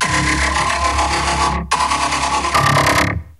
140.658 BPM
made using Reason 6.5. It's a heavily processed subtractor synth